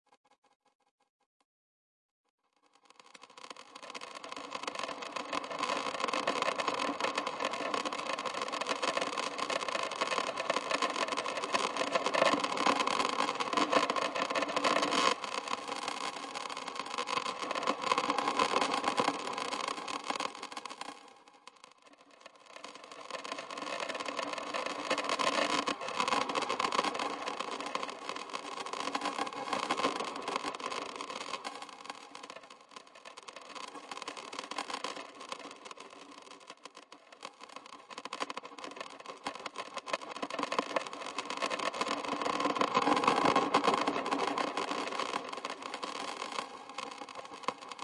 audio jaune
Crackling granular sound
flock, granular